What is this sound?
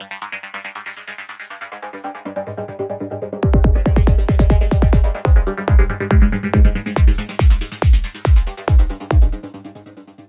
Aliens Invasion ( Trance )
drums, keyboard, kicks, trance